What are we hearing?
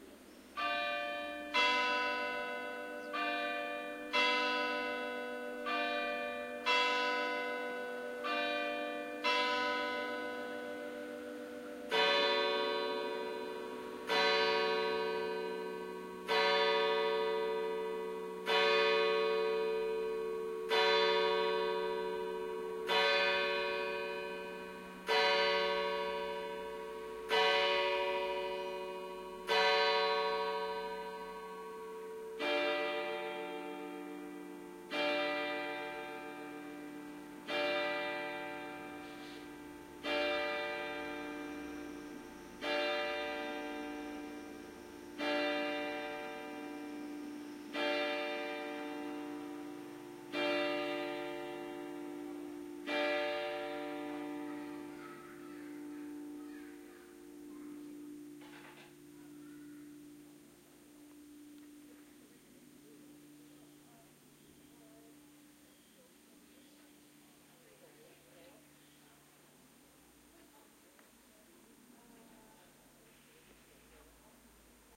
church clock striking
Church clock of Baiersbronn, a small town in the Black Forest region of southern Germany, striking nine o´clock. Lower frequences cut. OKM binaurals with preamp into Marantz PMD751.
time, church, bell, binaural, clock, strike